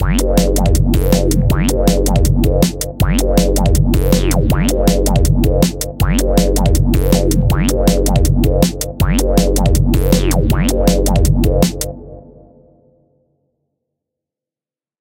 DnBbassline160bpm3+beat
Dark, acidic drum & bass bassline variations with beats at 160BPM
dnb, 160bpm, beat, bassline